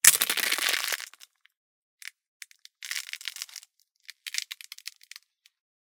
After the foot crushes the crackly thing, it continues to move slowly, making more crackly-grit sounds. See the pack description for general background.
crunch, grit, quash, squish